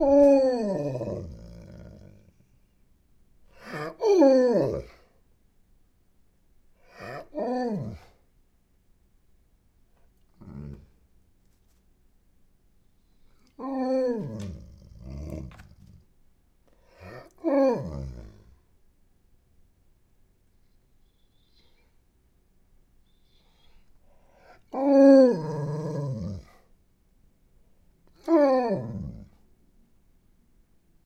A recording of my Alaskan Malamute, Igor, while he is waiting for his dinner. Malamutes are known for their evocative vocal ability. Recorded with a Zoom H2 in my kitchen.
malamute, growl, husky, howl, bark, sled-dog, wolf, dog, moan